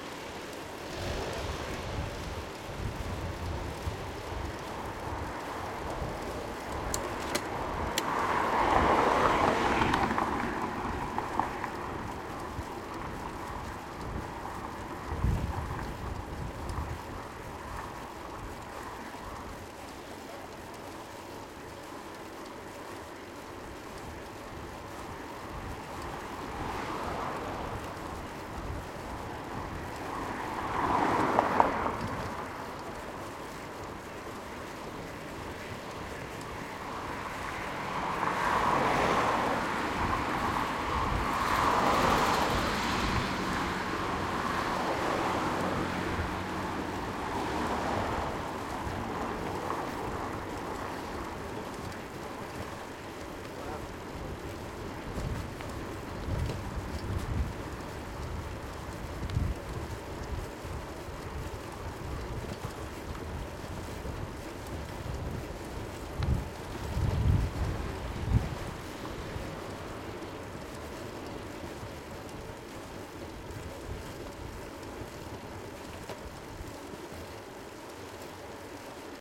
4ch surround recording of a bike riding through city traffic (Leipzig/Germany) on an evening in late spring.
Recorded with a Zoom H2 with a Rycote windshield in a shock mount fastened to the center frame above the pedals.
All recordings in the set are raw from the recorder and will usually need a hi-pass filter to deal with the rumble.
Riding along a major street with mild traffic, some freewheeling an gear switching, some cars pass by.
These are the REAR channels, mics set to 120° dispersion.
bicycle, bike, cars, chain, city, clank, cycle, field-recording, gear, mechanic, noisy, pedaling, ride, street, surround, traffic, wind